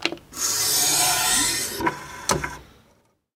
We were so intrigued by the sound that we felt we had to record it. However, the only mics in our collection with enough gain to capture this extremely quiet source were the Lawson L251s with their tube gain stage. Samples 15 and 16, however, were captured with a Josephson C617 and there is a slightly higher noise floor. Preamp in all cases was a Millennia Media HV-3D and all sources were tracked straight to Pro Tools via Frontier Design Group converters. CD deck 'played' by Zach Greenhorn, recorded by Brady Leduc.